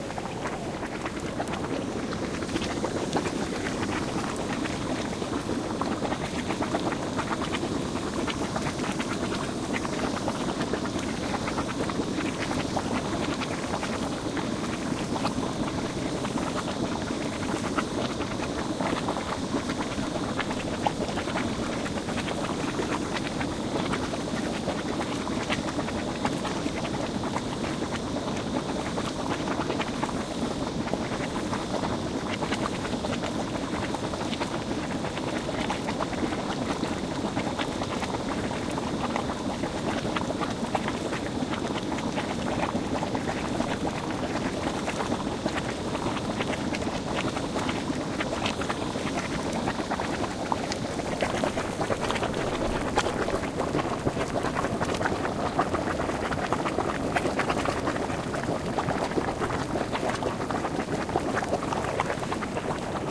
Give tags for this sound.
nature volcano iceland hotspring flickr geothermal bubbling field-recording

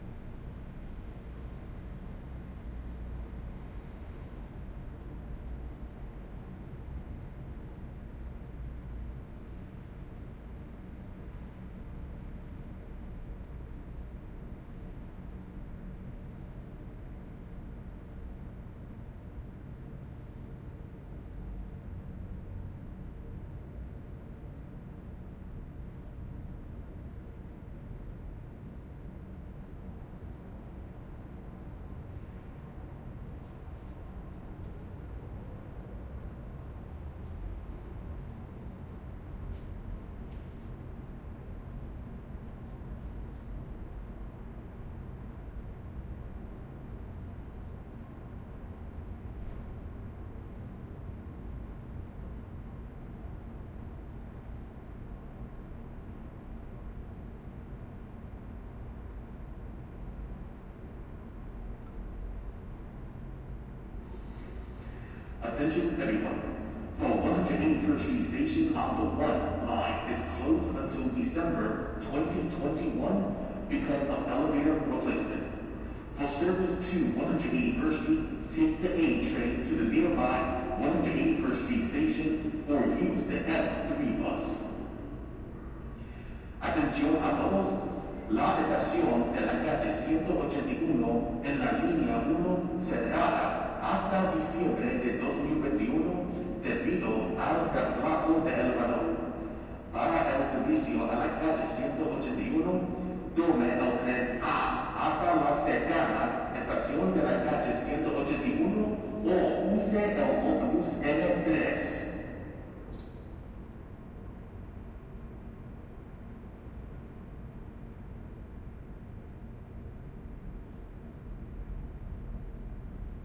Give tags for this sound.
1-train IRT MTA NYC New-York-City Spanish-announcement ambiance announcement arrival departing departure field-recording metro platform railway railway-station station subway subway-announcement subway-platform train train-station transit underground west-side

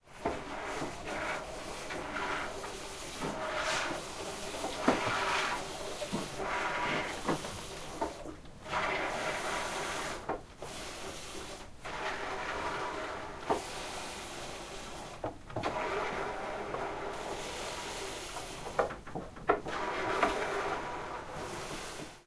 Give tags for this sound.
plastic lait bucket traire plastique seau full traite Milking vre Goat ch plein milk

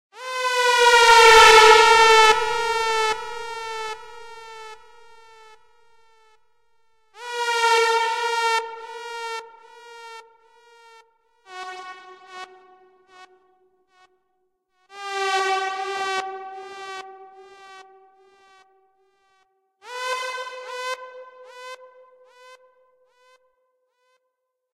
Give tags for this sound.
synthedit rasta scifi siren fx reverb reggae effect space dub alarm synthesized

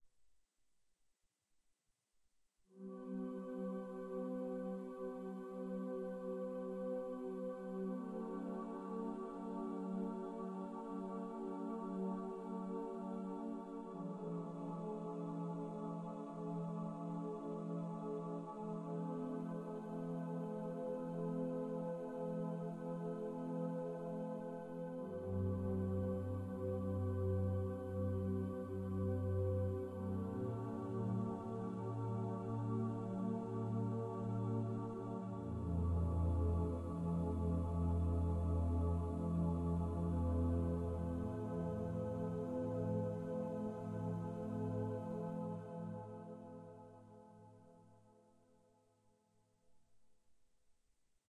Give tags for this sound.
ambience ambient atmosphere relaxation relaxing small space

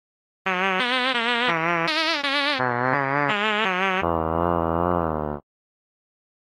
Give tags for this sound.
Fart machine melody

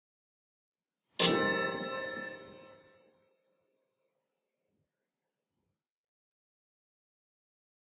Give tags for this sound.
chime chime-rod chimes chiming clock clockwork grandfather grandfather-clock hour strike time

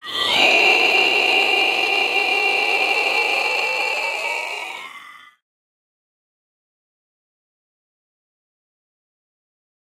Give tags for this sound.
Atmosphere Creature Creepy dry Eerie Effect Fantasy Film Game Growl High Horror Huge Monster Movie Mystery pitch Reverb Roar Scary Sci-Fi Scream Sound Sounddesign Sound-Design Spooky Strange

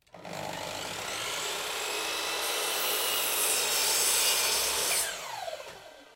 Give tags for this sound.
miter-saw circular-saw

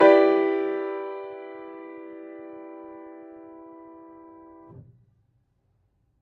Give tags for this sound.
chords keys

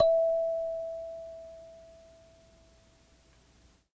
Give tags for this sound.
lo-fi mojomills collab-2 tape kalimba vintage

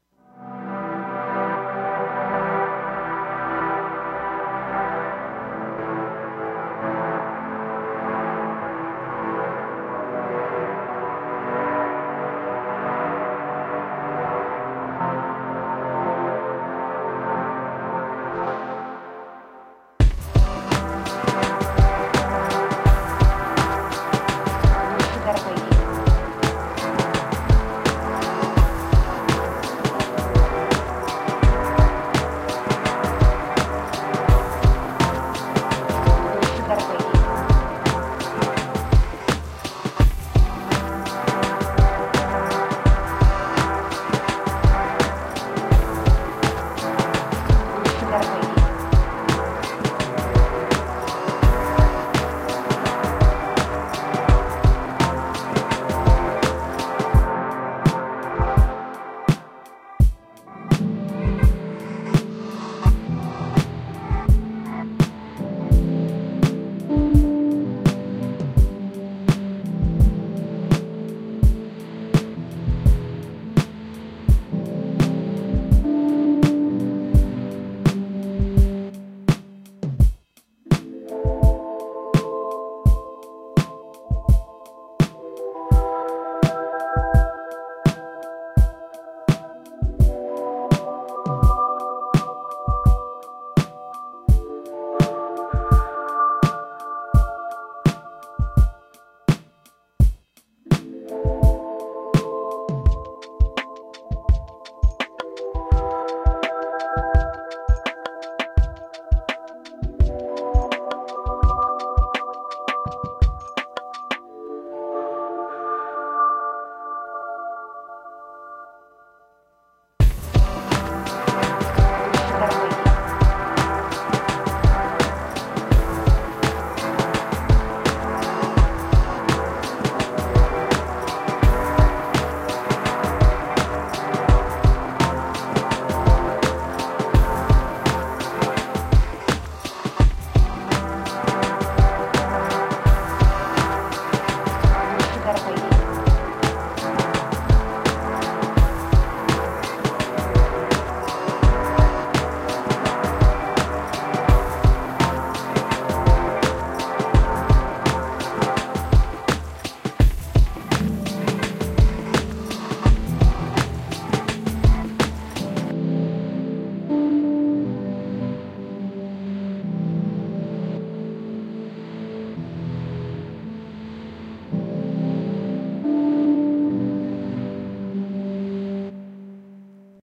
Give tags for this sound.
hop lofi psychedelic

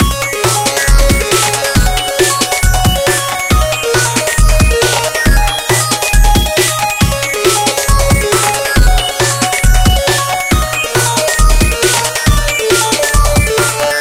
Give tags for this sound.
137bpm electronica loop neuro neurofunk vital vital-synth

